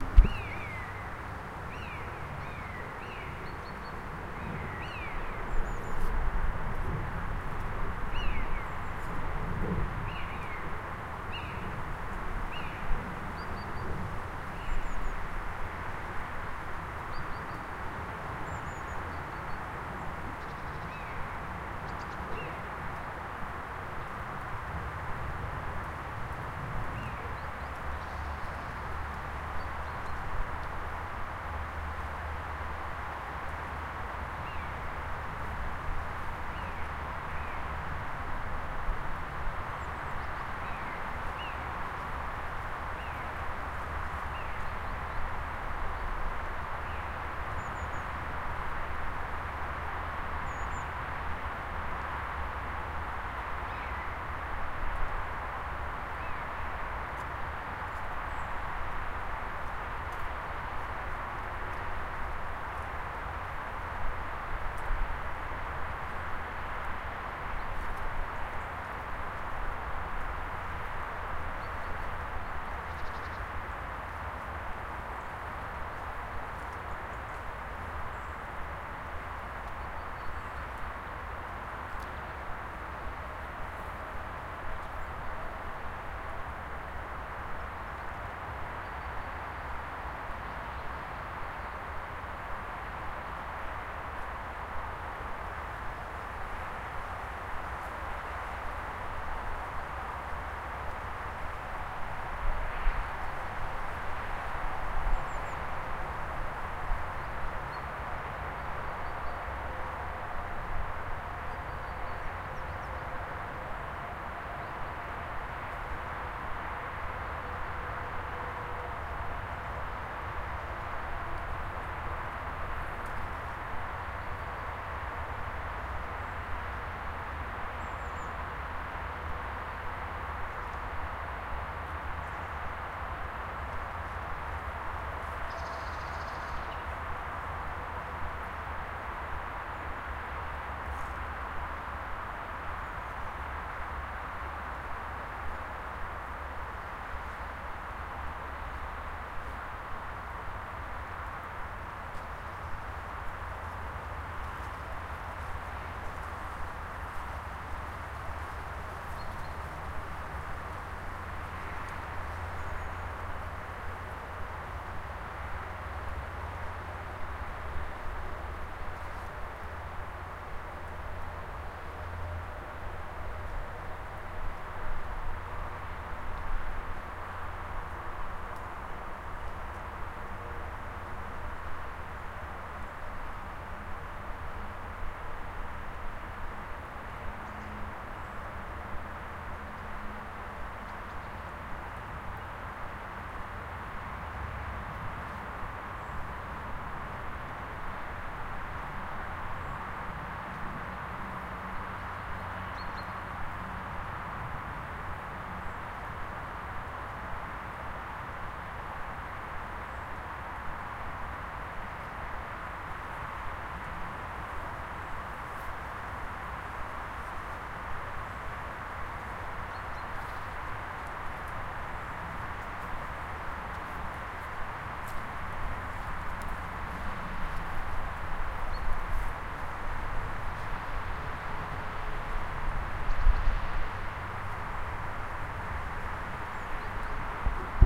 Woody field at winter
Winter environment of a woody field (nature conservation area) near to creek Szilas in Budapest. The permanent noise of M3 Thruway is in background. Appears common buzzards (Buteo buteo) and some tits (Parus sp.). Recorded with Zoom H1.
buzzard, daytime, nature-ambience, tit, winter